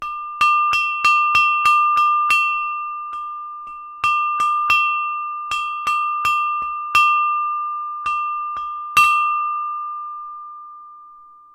bronze glocke
Stroked a bronze bell with a bronze club.
bell, bronze